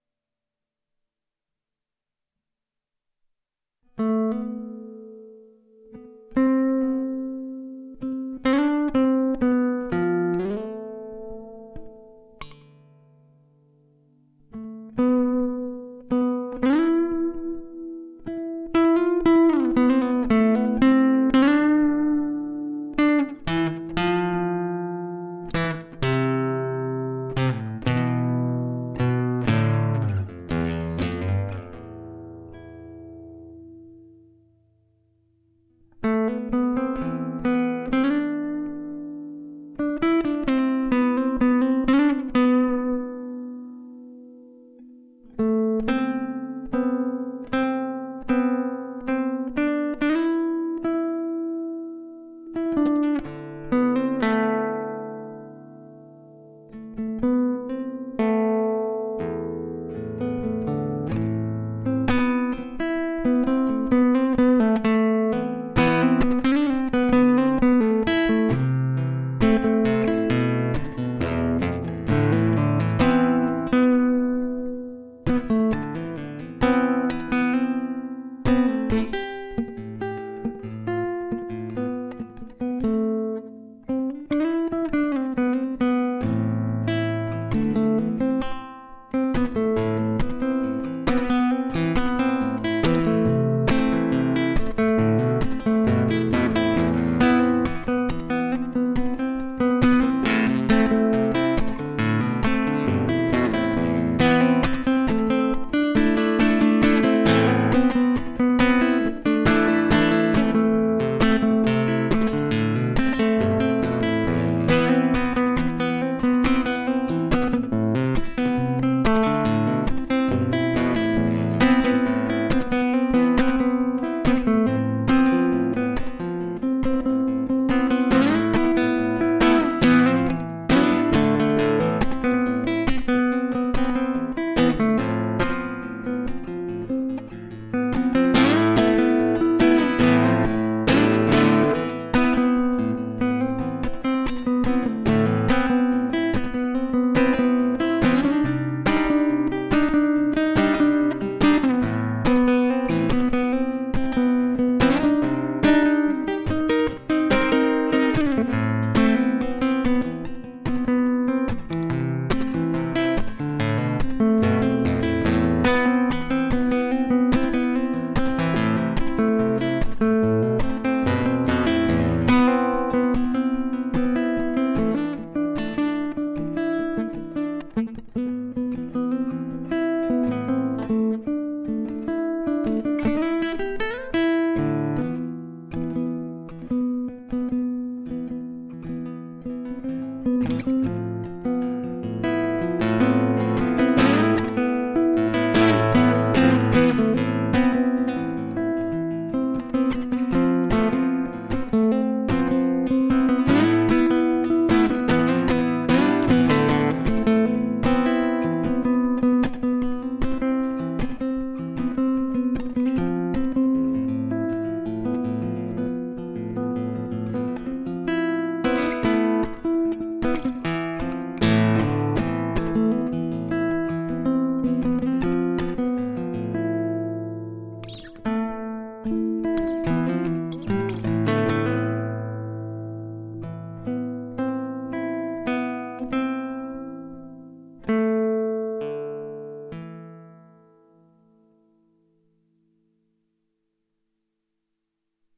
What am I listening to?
Dark Instrumental
A dark and somber melody played on an acoustic guitar with a slight reverb to create an expansive sound. Captures feelings of unease and mixed emotion.
Good for podcast intros or background music for storytelling or poetry.
Enjoy
acoustic-guitar, background-music, melody